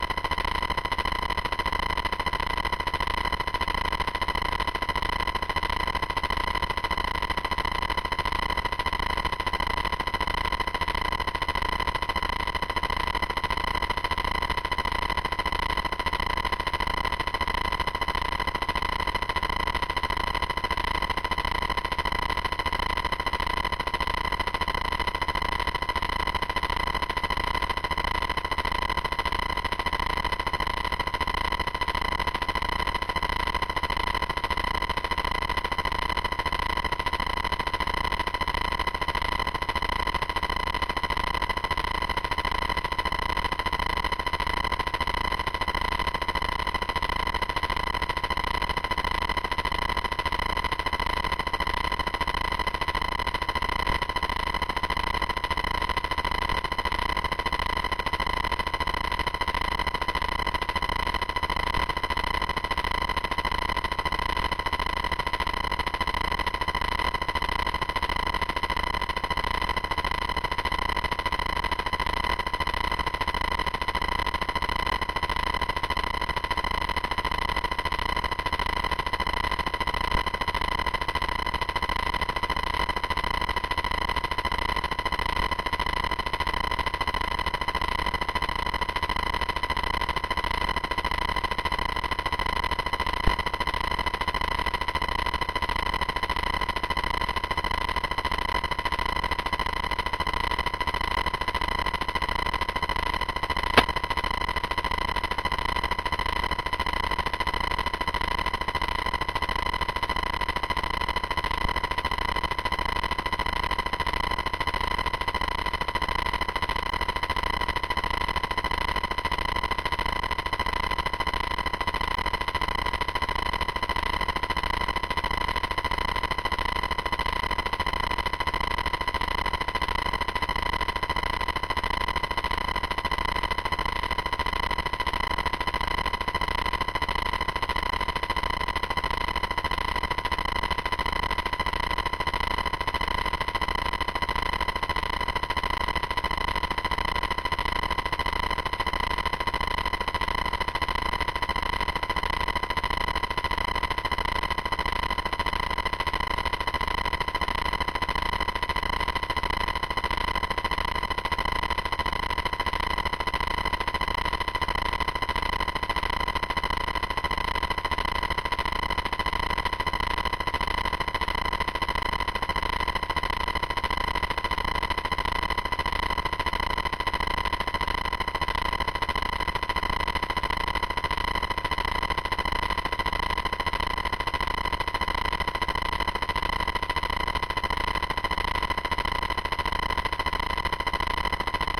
SDR 2014-09-04 100KHz Clicks
This is one of multiple samples I have recorded from short wave radio, and should, if I uploaded them properly be located in a pack of more radio samples.
How the name is built up:
SDR %YYYY-MM-DD%_%FREQUENCY% %DESCRIPTION% (unfortunately I didn't get to put in the decimals of the frequency when I exported the samples T_T)
I love you if you give me some credit, but it's not a must.
radio, SDR, noise, Short-wave-radio